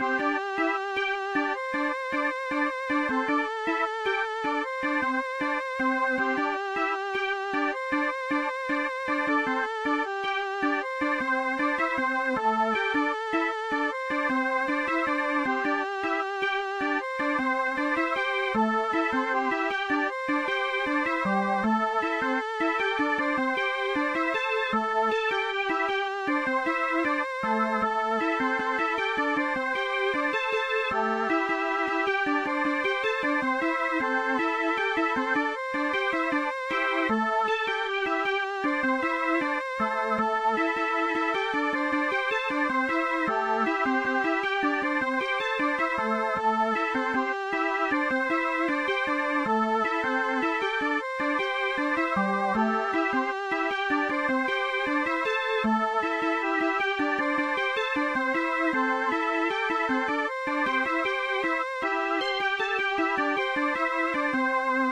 Thank you for the effort.